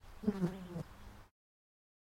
Fly Buzz FX
NATURE, FLY